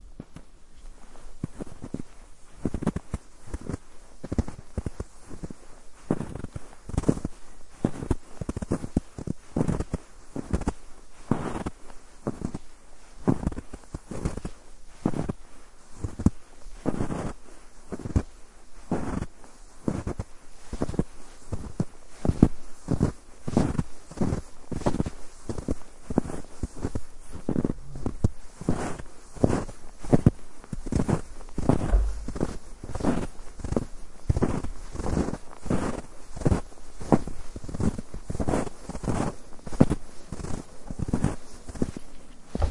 footsteps snow 0 degrees C
Footsteps on snow at 0 degrees C